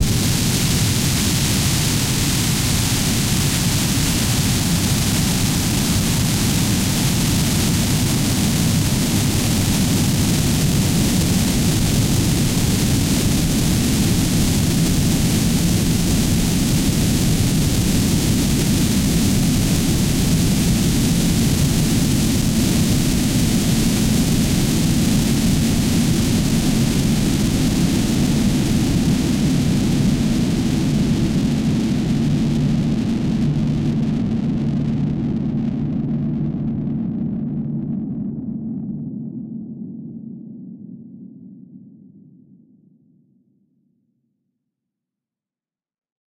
Someone wanted a nuke sound, so I created one. 45 seconds long. Recorded with FL Studio 9,7 beta 10. Microtonic plays a long deep white noise note, distorted with Guitar Rig 4 through different distortions and long cathedral reverbs from Reflektor.